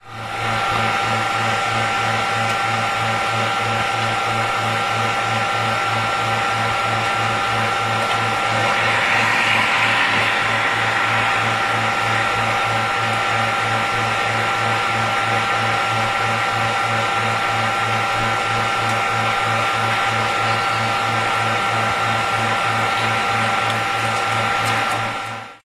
sobieszow cashmachine drone010710
01.07.2010: about 18.05. on the Sadowa street in Sobieszow(Jelenia Gora district/Low Silesia in south-west Poland). the drone created by the buzzing cash machine.
cas-machine, drone